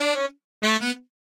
Modern Roots Reggae 14 090 Bmin A Samples
090, 14, A, Bmin, Modern, Reggae, Roots, Samples